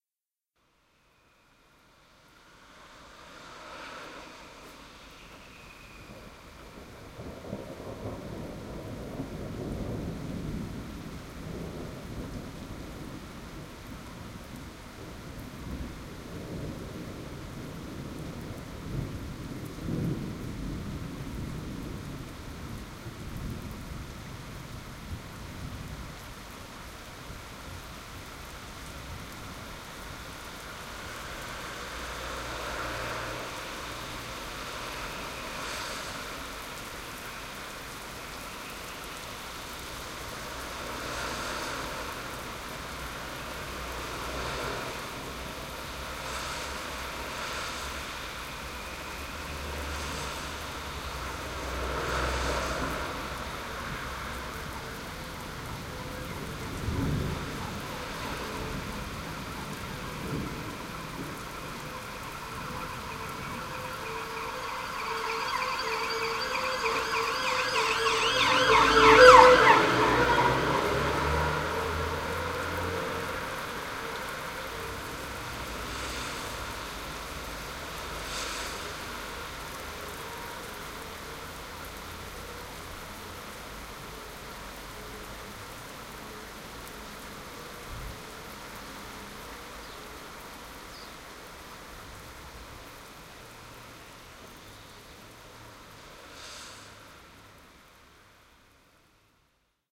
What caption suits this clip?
thunder siren
Extract from an hours recording of a thunderstorm. It sounds mono because I had the mic between my house and my neighbours. I moved it a little further into the recording to the front of the house. You can hear that the rain is becoming heavier, and a siren is heard just when a clap of thunder happens. You can hear traffic at the bottom of the road and some bird song. Recorded on Maplin stereo mic > Sony MZ-N1 MD on 24th Aug 2006
traffic, siren, weather, rain, thunder, field-recording, street, bird-song, urban